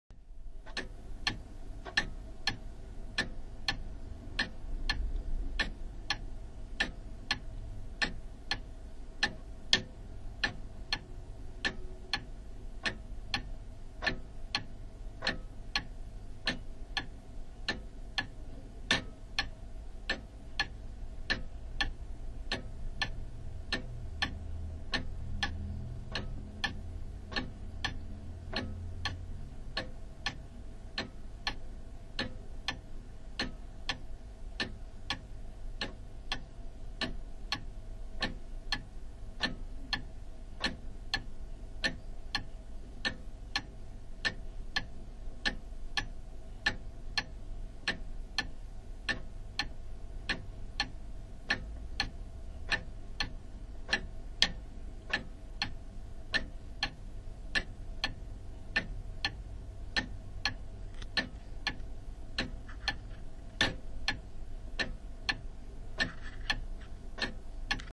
Grandads Wall Clock c1926
A recording of my grandfather's old pendulum wall clock, made in about 1926. The mechanism is worn and ticks unevenly, with occasional clunks from damaged cogwheel teeth. Recorded from inside the case, it is entirely unedited so contains slight ambient noise.
Old-clock, Pendulum-clock, uneven-tick, Wall-clock, worn-clock